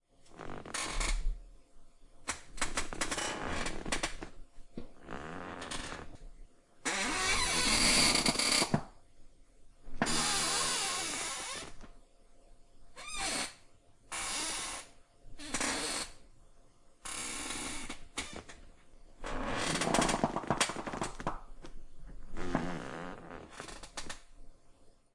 Squeaking Office Chair
A recording of my old office chair being moved around.
Edited in audacity to remove noise and shorten the sound a bit.
chair, move, old